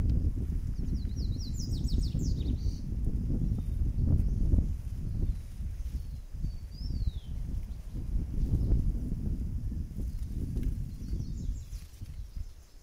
low winds
nature, wind